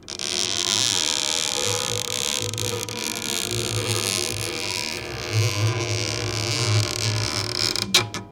I scrapped a pick down the low E string of an acoustic guitar. Recorded with Rode NTG-2 mic into Zoom H6 Handy Recorder.
scrape, erie, string, horror, guitar, creak, creepy, door